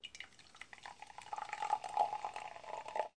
58-liq vertido
A soda being spilled
soda, liquid, drink